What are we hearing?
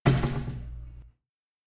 The sound of a metal ladder hitting a wood plank. It can also be described sound as a metal or wooden door closing. There is some reverb and equalization added.
Recorded with a simple Coolerstorm Ceres 500 microphone.